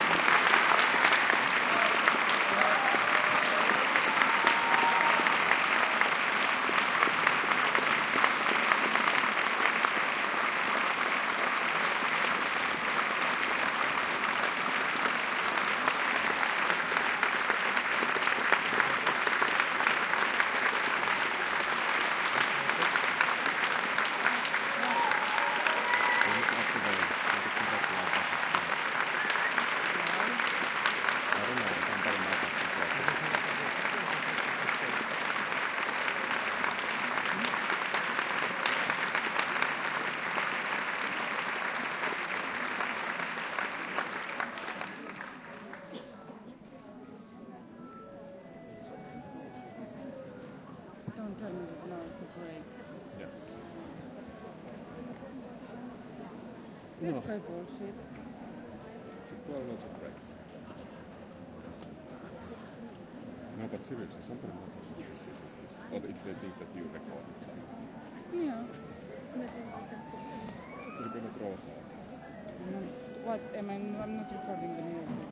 Audience applause big theatre
Applause in a big theatre recorded from balcony. Recorded with a Tascam DR-05
applause, clapping, audience, crowd, theatre